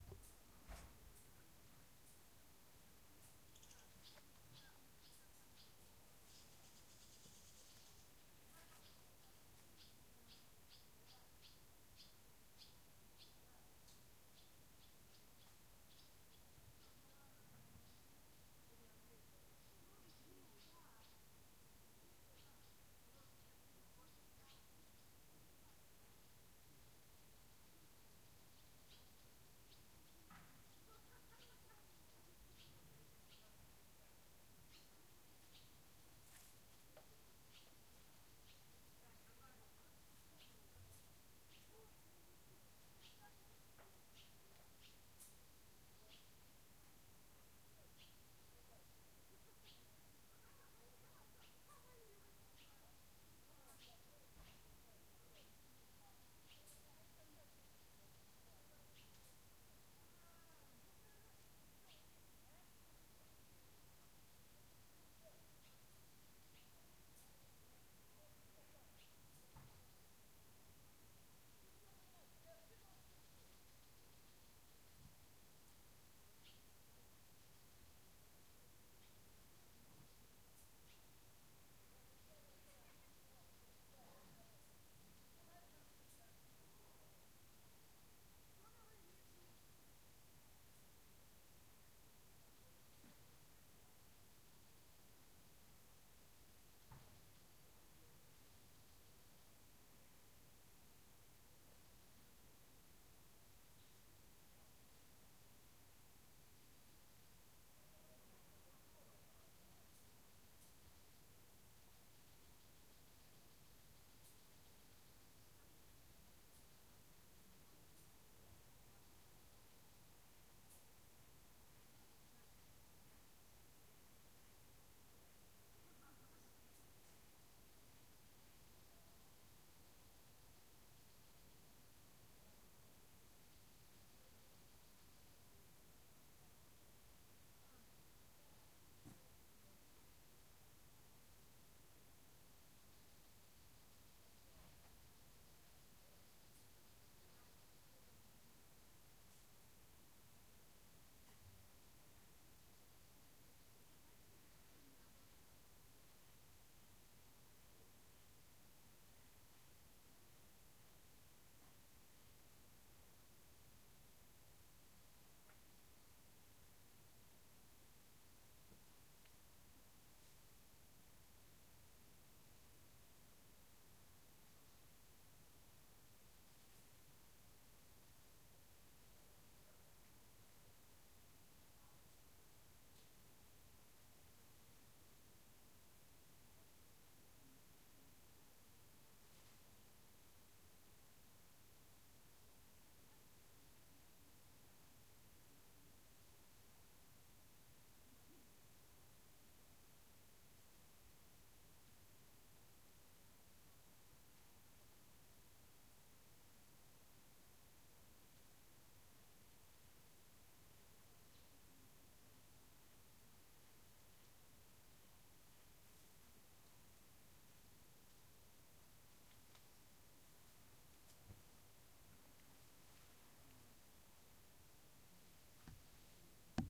Very quiet village evening ambience 1
This sound recorded in a small village near Yaroslavl in Russia. Very quiet evening ambience, birds, no wind.
ambience, ambient, evening, field-recording, quiet, village